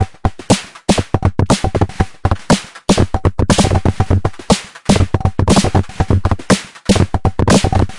A four bar four on the floor electronic drumloop at 120 BPM created with the Aerobic ensemble within Reaktor 5 from Native Instruments. Very weird electro loop. Normalised and mastered using several plugins within Cubase SX.